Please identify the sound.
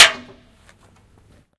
Random Sound 2
Me hitting a Medium Sized Tin Bucket
Actual, Field, Metal, Percussion, Recording, Tin, Sound, Can